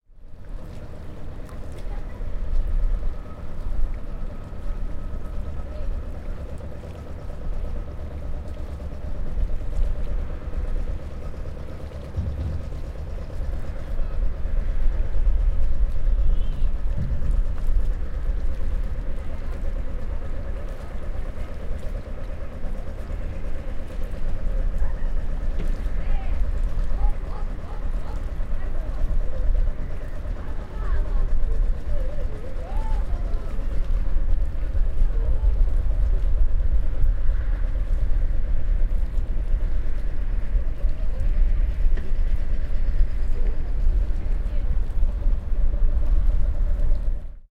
Sound of calm sea in the harbour. You can also hear engine running in the distance and some backnoise of the town.